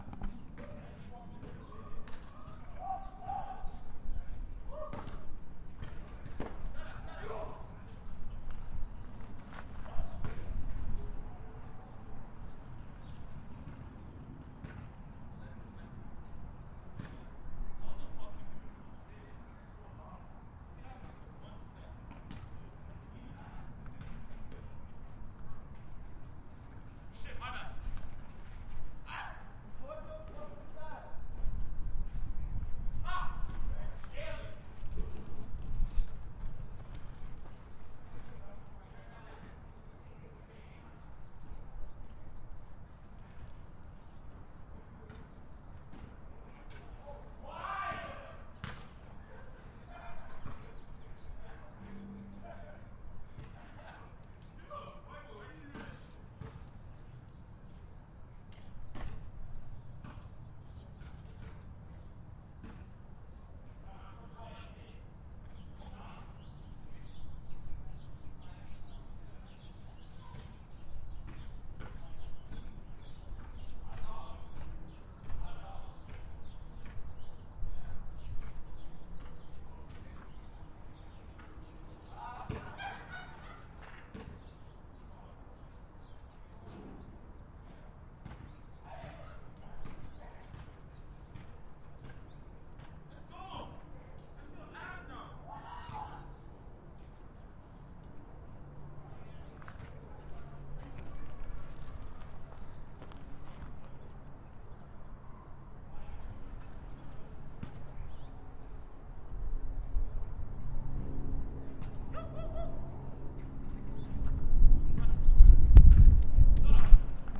Basketball Game External Teenagers
recorded on a Sony PCM D50
XY pattern
Basketball, External, Game, Teenagers